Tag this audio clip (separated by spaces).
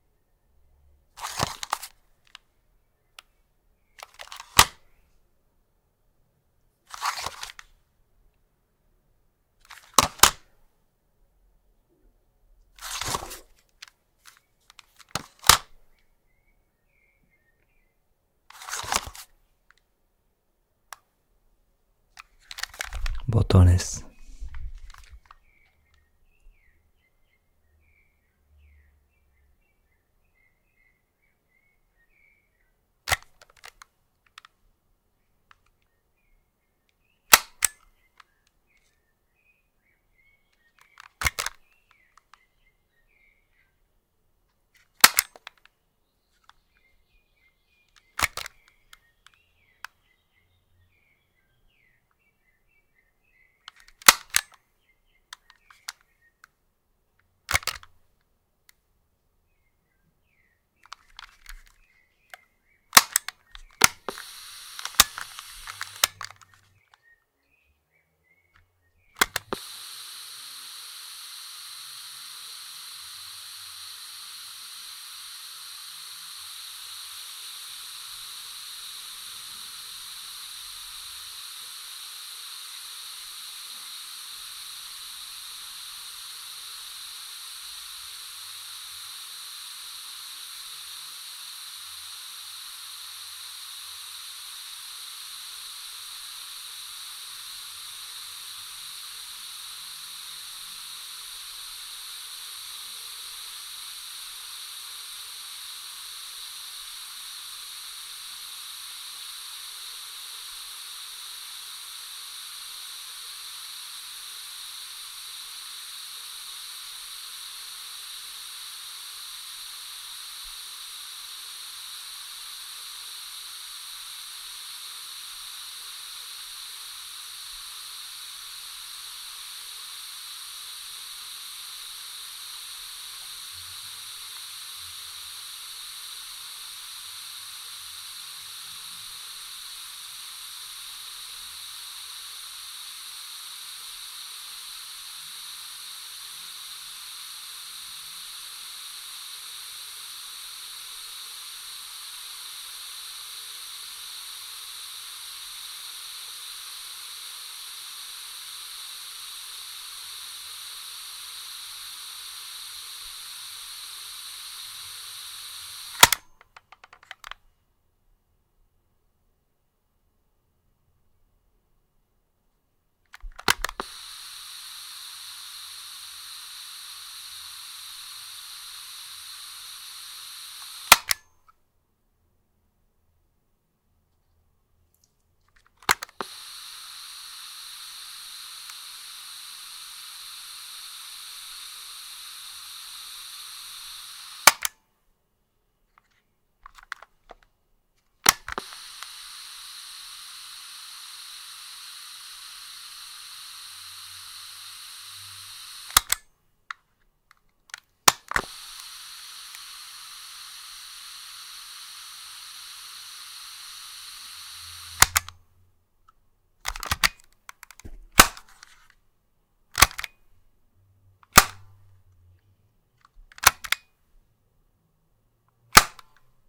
button cassette recorder sony tape